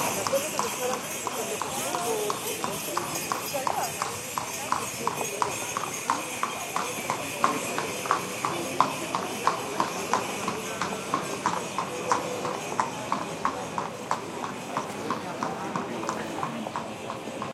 horse-drawn carriage (with bells) passes by, voices
ambiance, city, field-recording, horse-bells, seville, south-spain